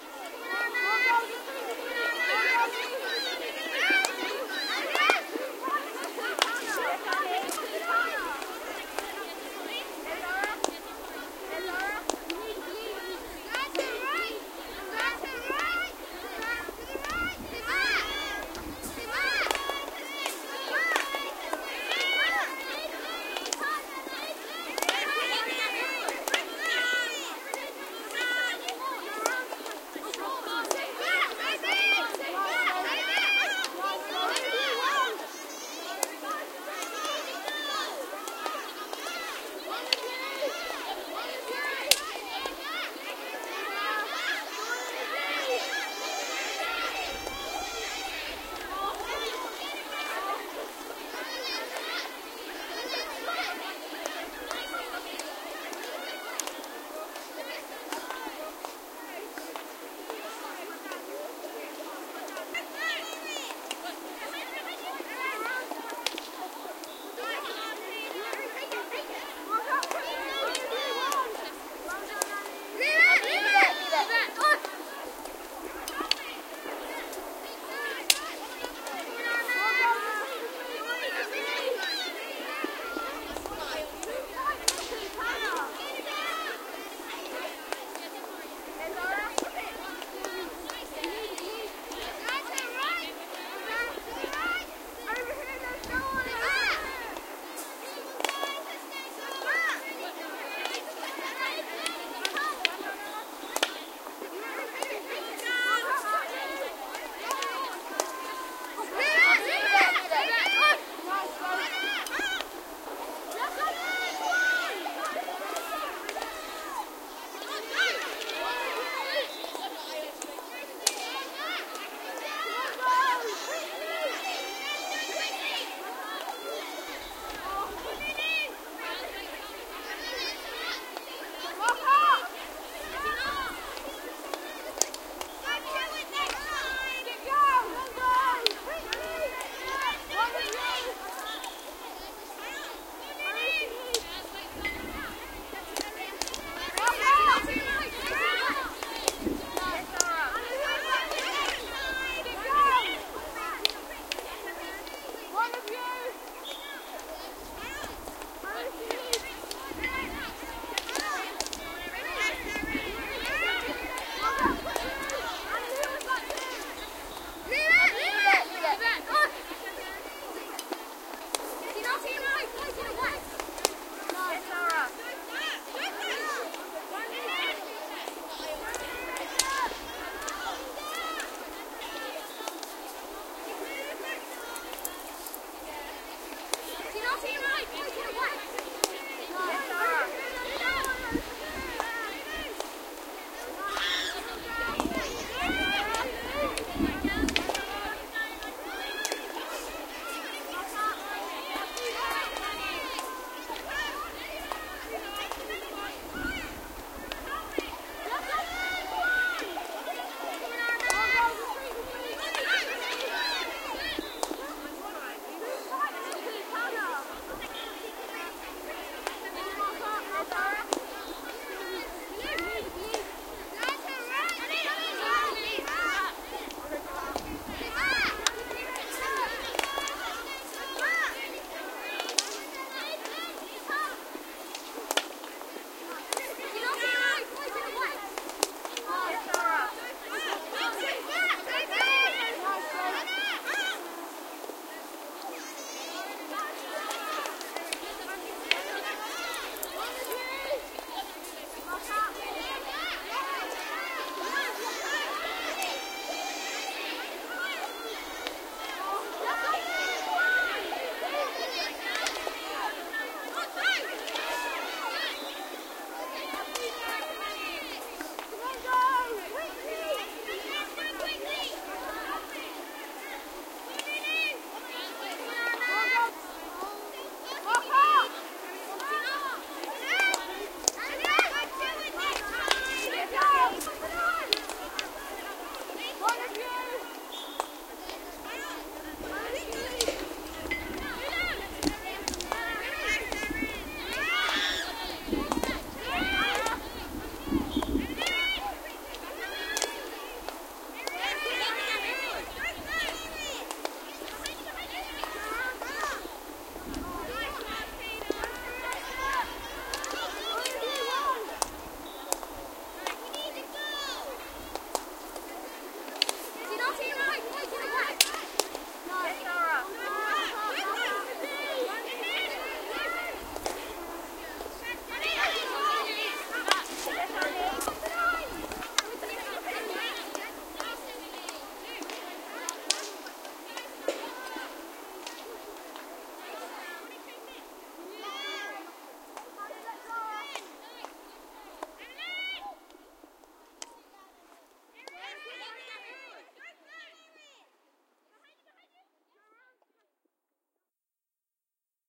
Hockey match no whistles 4
English girls hockey match back ground game referee's whistle removed
ambience, background-sound, field, hockey, recording, sport